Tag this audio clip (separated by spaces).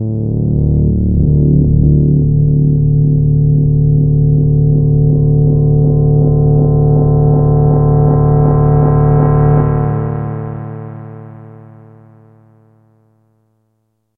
pad note sound-design evolving nord digital fm drone multisample multi-sample